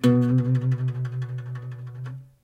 student guitar vibrato B
Vibrato notes struck with a steel pick on an acoustic small scale guitar, recorded direct to laptop with USB microphone.
acoustic, guitar, scale, small, vibrato